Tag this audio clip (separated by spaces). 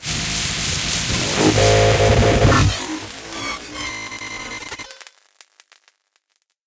electronic noise sci-fi soundeffect strange